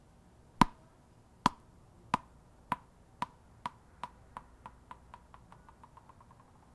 Out on the patio recording with a laptop and USB microphone. A racquetball bouncing naturally after being dropped.
ball, outdoor, atmosphere, patio